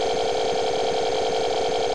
A sci-fi large machine sound (think of a transporter), use it for a transport machine sound in a movie/cartoon/meme/etc.